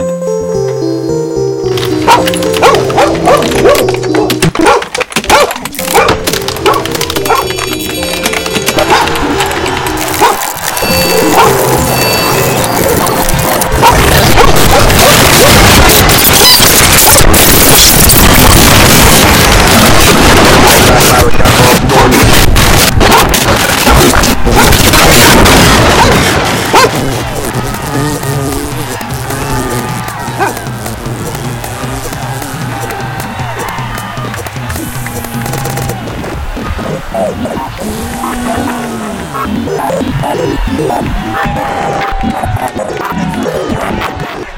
Offensive requiem for a slimy reverend
Remix of a few samples that can be found here:
anger, brutal, crush, drum, funny, glitch, mangled, noise, noise-dub, non-art, rage, silly, useless, voice